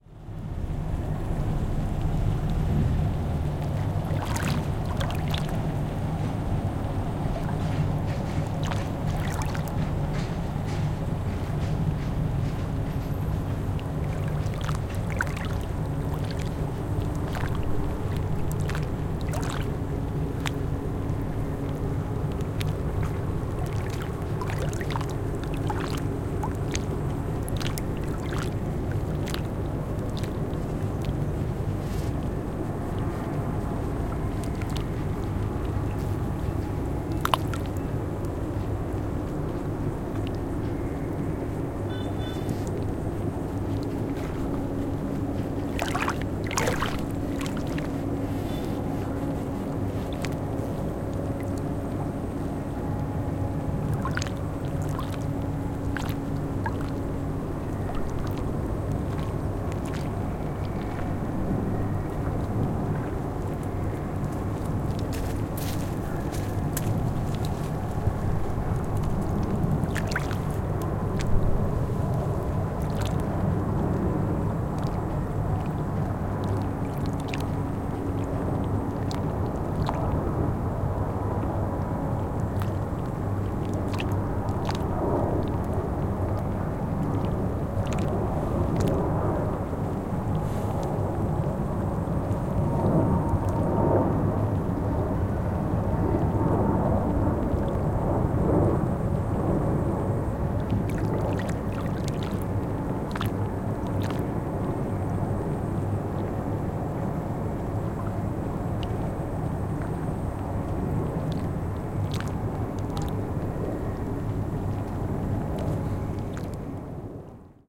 Ambient Coimbatore Lake
Recorded on January 29th, 2018 @ 3:05 PM IST at Singanallur Lake, Coimbatore, Tamil Nadu, India.
Lake water galloping while a plane and an insect fly by with a factory at the lake horizon.
ambiance, ambient, atmosphere, factory, field-recording, india, insect, lake, nature, plane, soundscape, south-India, tamil-nadu, water